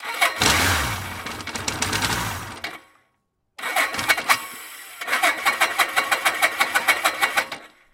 delphis SUZI COLD START LOOP #120
Cold Start Suzuki GSX 1100e engine sounds while the engine was cold.
1100e, 120bpm, coldstart, engine, gsx, loop, motor, motorcycle, reverse, suzuki